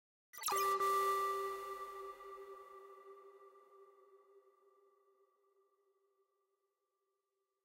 Effect FX Cyber
A sweeping effect inspired by a Cyberpunk city scape.
I used ANA vst sythn to make it adding some reverb and other effects.